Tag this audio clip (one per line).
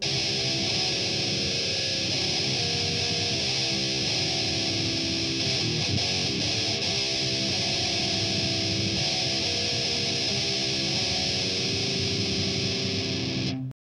groove
guitar
heavy
metal
rock
thrash